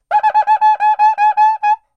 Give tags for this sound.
brazil
drum
groove
pattern
percussion
rhythm
samba

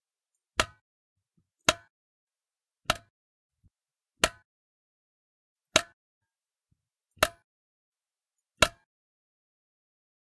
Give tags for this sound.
electricity
switches
click
electric
off
switch
clunky
domesticclunk

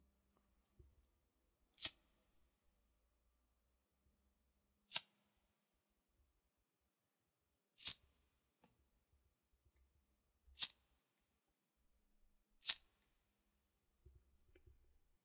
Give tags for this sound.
briket
sonido-briket
encendedor

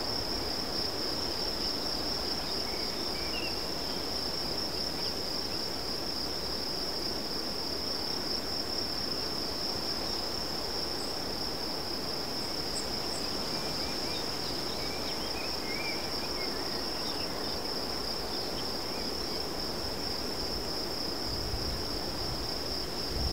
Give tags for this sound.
birds
crickets
field-recording
grillos
nature
pajaros
rio
river

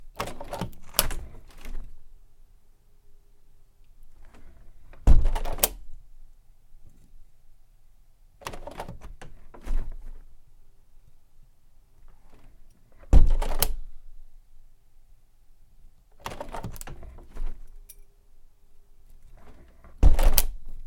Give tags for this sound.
close
closing
open
opening
window

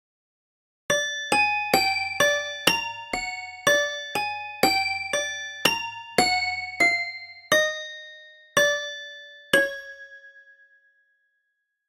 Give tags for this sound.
anxious
creepy
crime
dramatic
evil
fear
fearful
frightening
ghost
Gothic
halloween
haunted
hell
horror
intense
intro
macabre
monster
murder
mysterious
nightmare
phantom
satanic
scary
sinister
spooky
suspense
terrifying
terror
thriller

